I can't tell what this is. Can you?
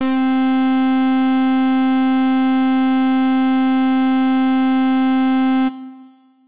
Full Brass C4
The note C in octave 4. An FM synth brass patch created in AudioSauna.
synth, synthesizer, fm-synth, brass